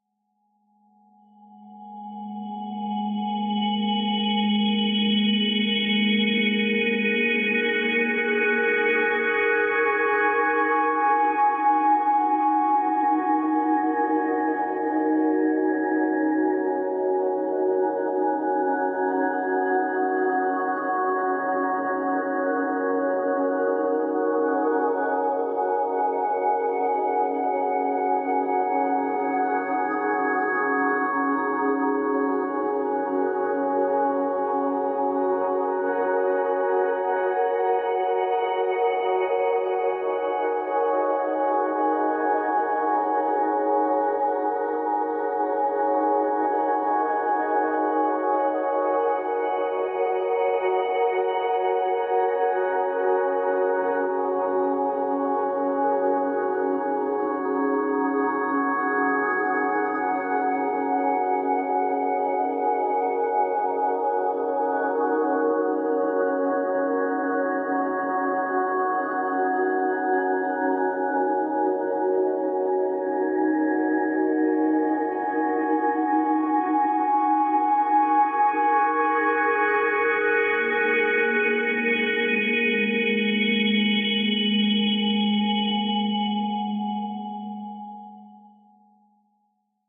[33] s-vibe-reverrrb-soundscape-rvrs-st
vibraphone, evolving, ambient, pad, soundscape, pentatonic, vibe, symmetric